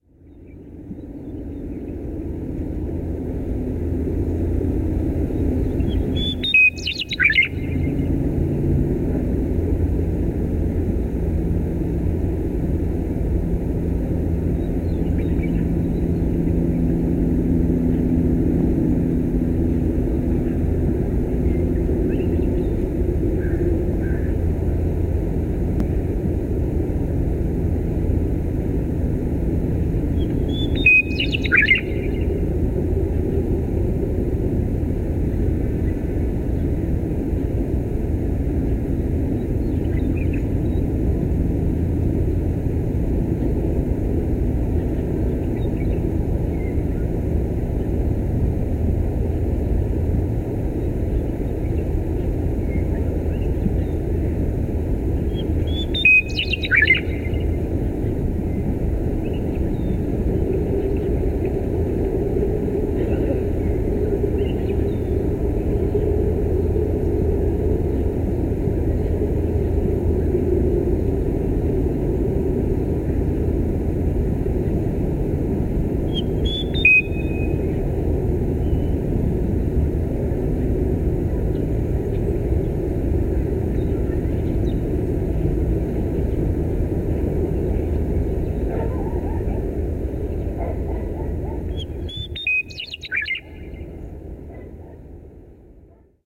CFv1 track06
california, sherman-island, sturnella-neglecta